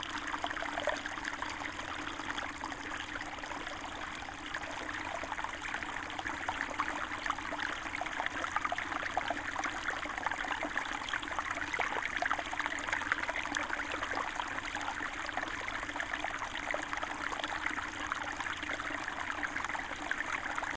Using advanced audio techniques and some clever audio manipulation I created this great water flow with two channels that blend to create a fantastic creek flow sound.